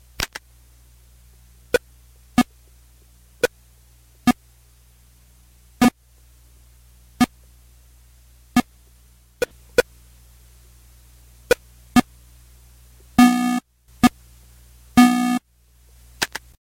Enjoy. Couldn't find it on the net so made it! Comment if you use it please.
Arcade, Computer, Game, Pong, Tennis